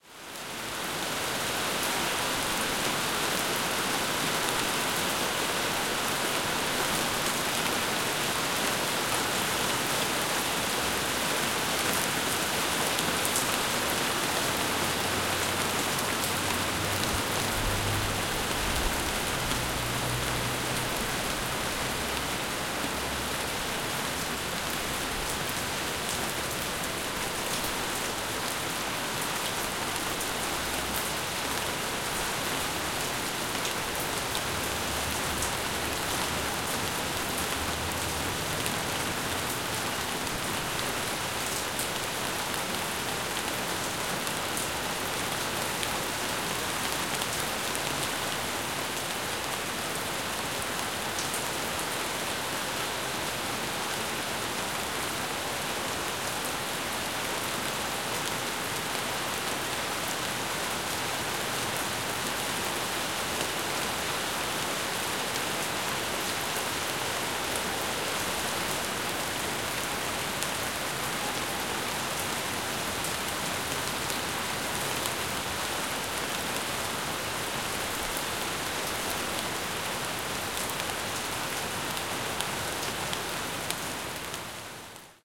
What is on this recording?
rainy day in são paulo (brazil)
Recording of a light rain on the suburbs of a big city.
Recorded with the Zoom H4n in-built stereo mics, from a window.
Rain drops on concrete ceilings, roofs and asphalt; distant traffic sounds.
ambience, drops, field-recording, pouring, rain, rainy, stereo, urban, weather